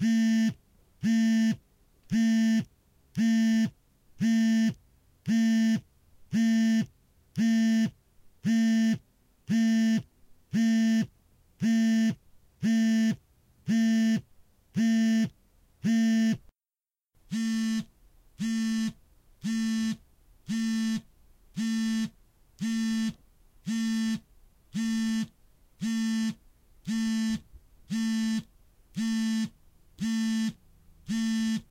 Well i needed this sound for a project myself.
I set the alarm on my smartphone and smashed the record button.